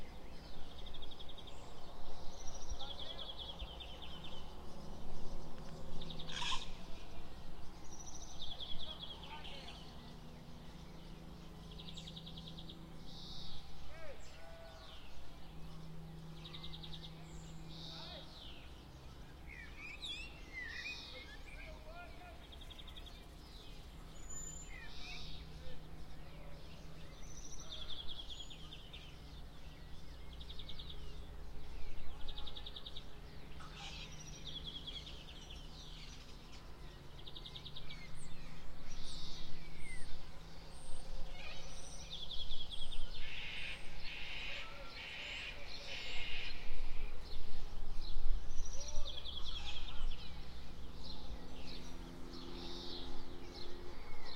Small Town #5
Hamilton, Tasmania, ambience: man calling out “Have you got the plug out?”, “Righto”, wattlebird, cockatoo, truck in distance.
Recorded on a PMD661 with a Rode NT4, 18 October 2017, 7:50am.
Tasmania, rural, small-town